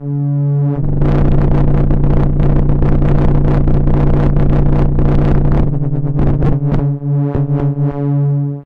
Sounds from an analog sound device called 'The Benjolin' a DIY project by Rob Hordijk and Joker Nies. Sometimes recorded in addition with effects coming from a Korg Kaoss Pad.
benjolin, circuit, noise, electronic, sound, analog, hardware, synth